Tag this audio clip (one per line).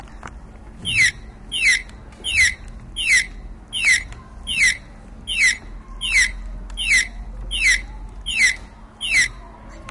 santa-anna
cityrings
spain